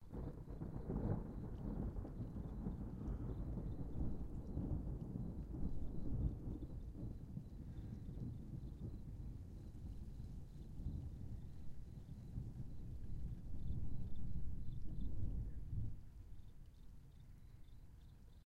Thunder Long (chill)
Some very chill thunder as a storm rolls in.
Two Rode NT-1A's pointed out a large window on the second story of a building.
thunder, lightning, storm, thunder-clap, thunderstorm, weather